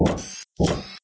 stomps robot walk
Two steps from recorded from Mr Stomps walking. Mr. Stomps is a refrigerator-sized robot with loud clanking feet and whining servos to move his legs.
mechanical, robot, robot-walk, science-fiction, sci-fi